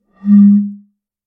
Bottle blown 02
Some sounds of blowing across the top of a glass bottle.
Specifically a 33cl cider bottle.:-)
Captured using a Rode NT5 small-diaphragm condenser microphone and a Zoom H5 recorder.
Basic editing in ocenaudio, also applied some slight de-reverberation.
I intend to record a proper version later on, including different articulations at various pitches. But that may take a while.
In the meanwhile these samples might be useful for some sound design.
One more thing.
It's always nice to hear back from you.
What projects did you use these sounds for?
33cl, air, blow, blowing, blown, bottle, building-block, closed-end, columns, glass, one-shot, recording, resonance, resonant, sample-pack, samples, tone